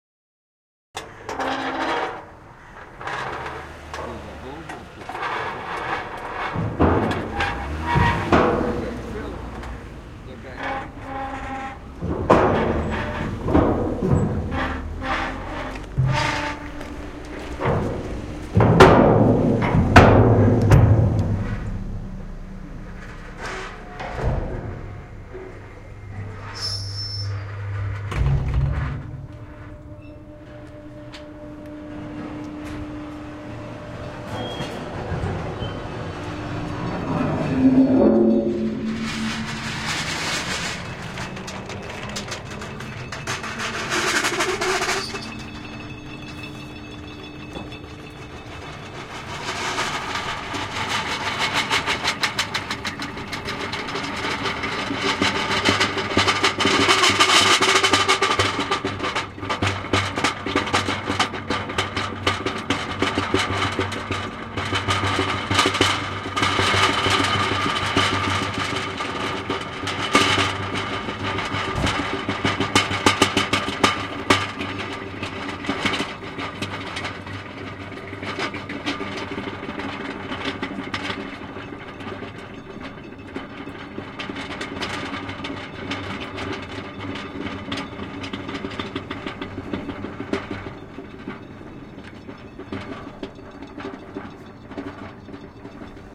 KE4077QX
Recording of the chain that is used to pull a ferry. Heavy metal to metal sound.
metal, chain, field-recording